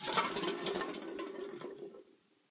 56 recordings of various manipulations of an (empty) can of coke on a wooden floor. Recorded with a 5th-gen iPod touch. Edited with Audacity
hit, tick, place, rolling, crush, move, crunch, metal